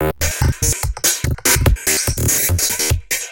These are heavily processed beats inspired by a thread on the isratrance forum.